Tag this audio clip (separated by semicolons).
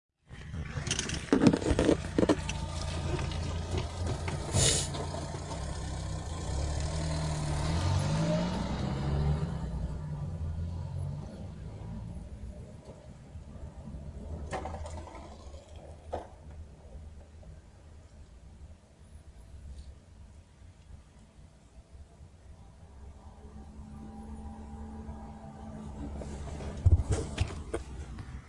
noise; car